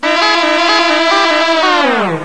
A tourist model of a indian
snakecharmer. Not played in traditional way. The last note has a computer processed downward glissando. Recorded at 22khz